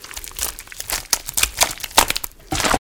Pumpmkin Guts 9 falling out
Pumpkin Guts Squish
guts, pumpkin, squish